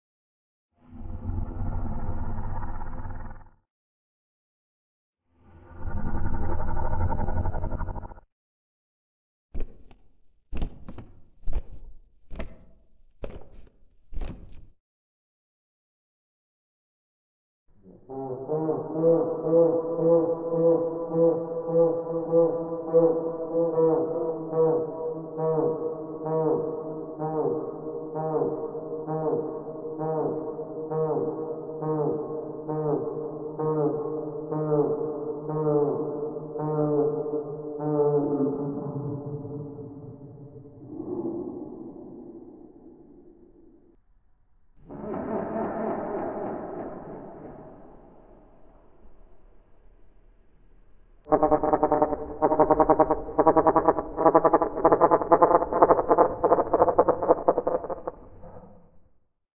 je monster
Monster sounds, taken from processed belches and bird calls
growl, shriek, breathe, monster, groan, moan